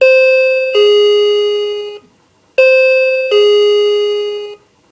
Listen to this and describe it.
A recording of a typical modern electronic doorbell. It sounds its chime twice.
bell, ding-dong, doorbell, electronic, ring, ringing